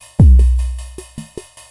Rhythmmaker Randomized 140 bpm loop -68
A experimental broken loop with a heavy kick drum in it. This loop is part of the "Rhythmmaker Randomized 140 bpm
loops pack" sample pack. They were all created with the Rhythmmaker
ensemble, part of the Electronic Instruments Vol. 1, within Reaktor. Tempo is 140 bpm
and duration 1 bar in 4/4. The measure division is sometimes different
from the the straight four on the floor and quite experimental.
Exported as a loop within Cubase SX and mastering done within Wavelab using several plugins (EQ, Stereo Enhancer, multiband compressor, limiter).